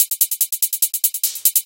hi hat loop